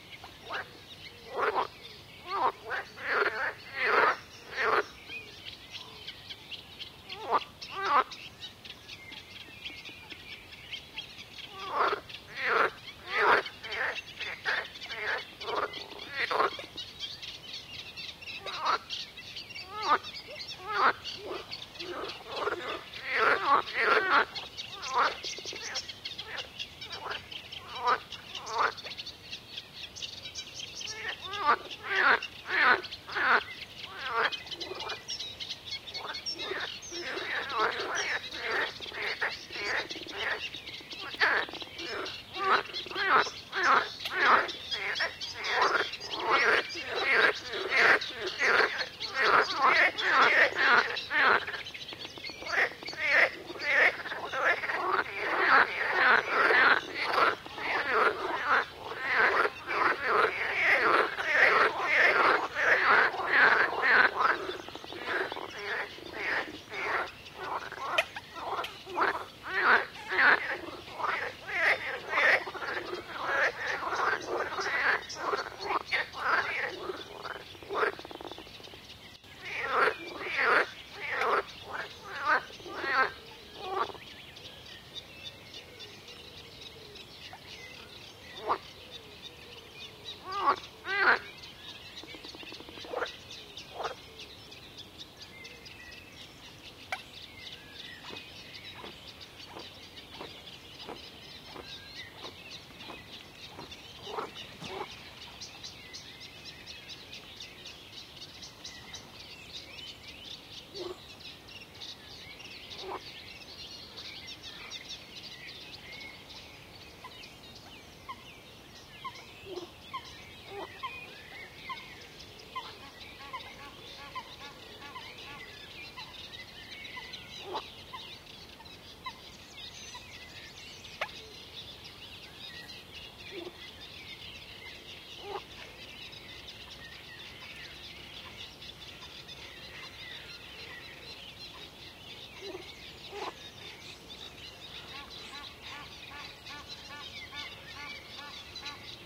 An early morning recording from the banks of the Rhine river in Eglisau Switzerland.
Biophonic invasion of the Marsh frog (Pelophylax ridibundus) that is taking over the area.
Croaking frogs
Birds singing
Swans landing and taking flight
fish jumping
Busy early wildlife down on the river